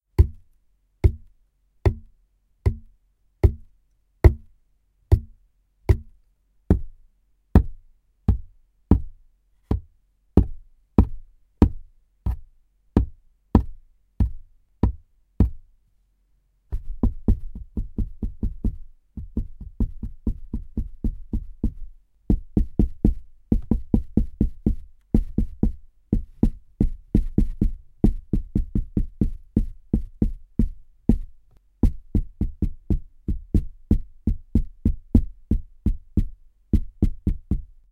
Bashing, Cardboard Box, Interior, A
Raw audio of hitting a small cardboard box with my hands with the recorder placed inside the box, as requested.
An example of how you might credit is by putting this in the description/credits:
The sound was recorded using a "H1 Zoom V2 recorder" on 5th January 2017.
Interior, Hitting, Box, Bashing, Cardboard